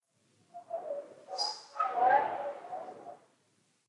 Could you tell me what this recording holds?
21390 todd-bates come-back Isolated
anomaly, evp, ghost, paranormal, real, scary, voices